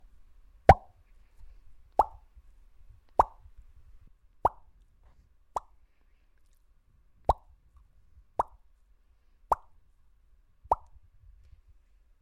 Plopping Plop Popping
bubble, bubbles, mouth, plop, plopping, plops, pop, popping, verbal, water